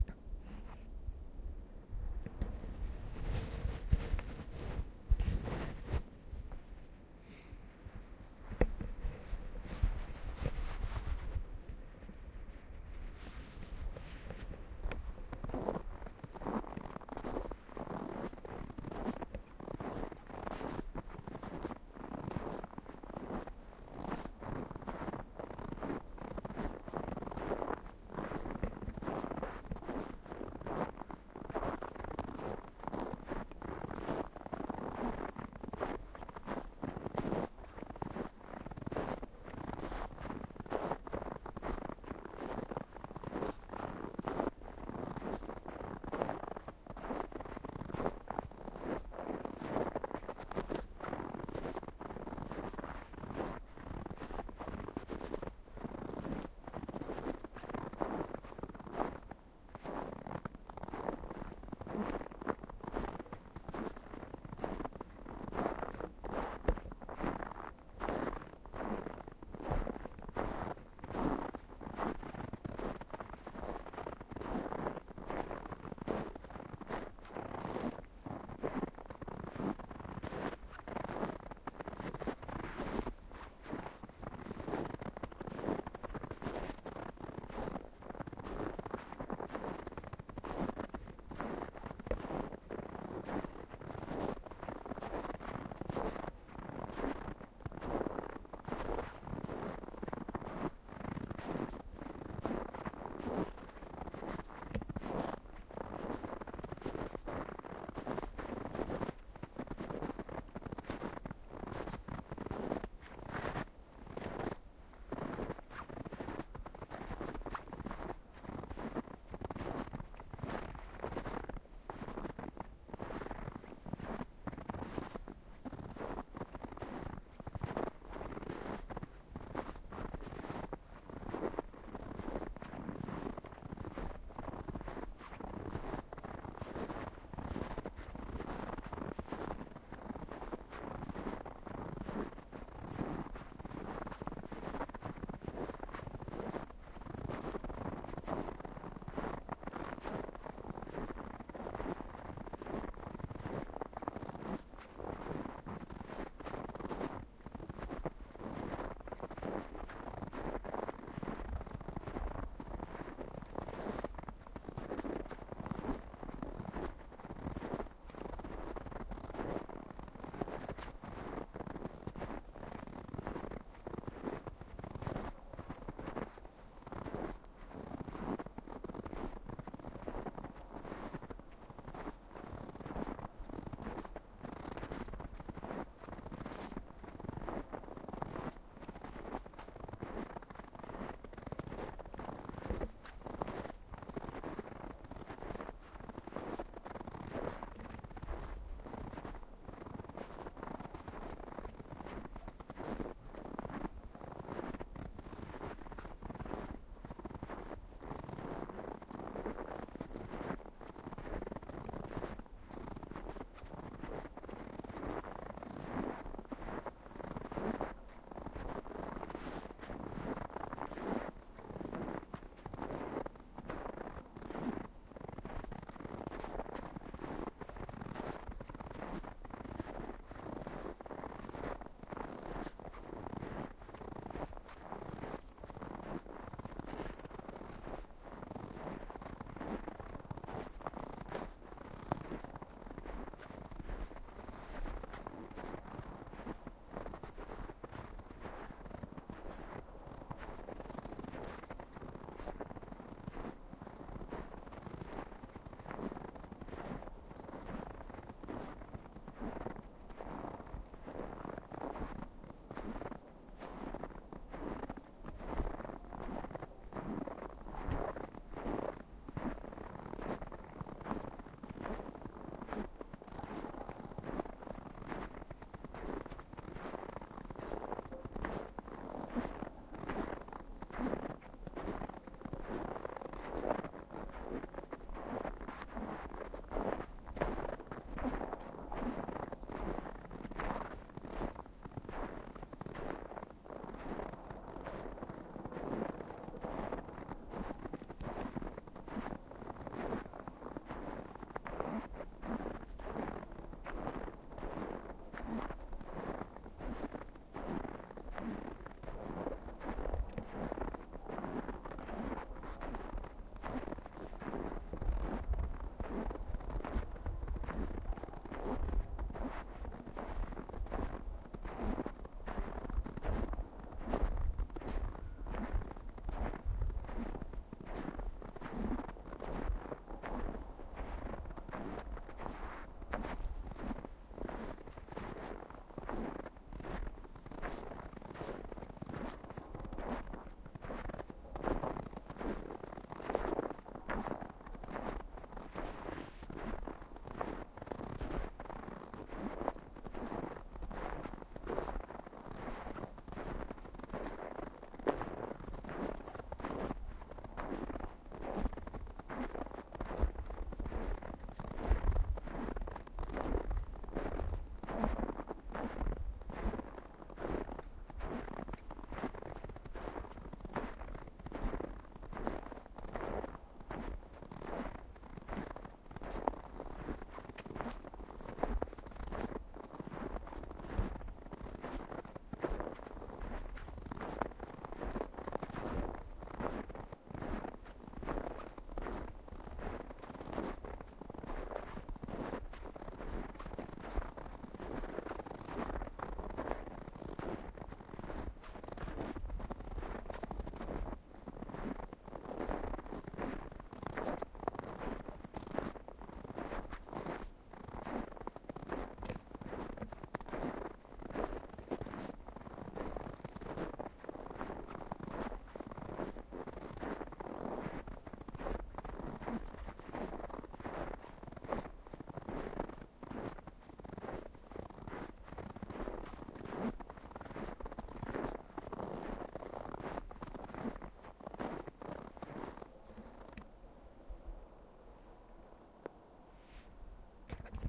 Simply walking through a snowy forest in a temperature of about 10 degrees below zero (centigrade) with microphone pointed at feet. Recorded with a Zoom H1.
field-recording, footsteps, snow, winter